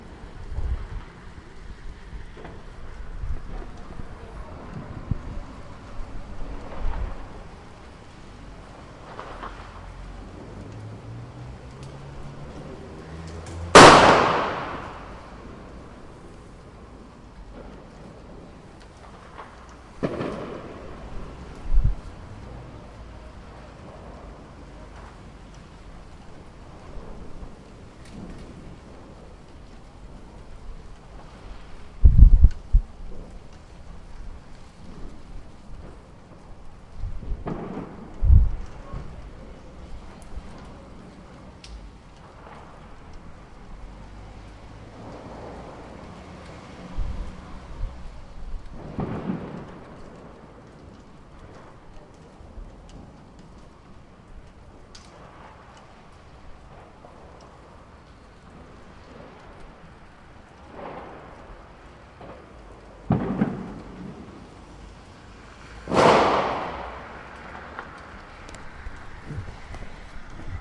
rainy night/ policecars/ fireworks/ people talking/ cars on the move : new year 2013 in Borgerhout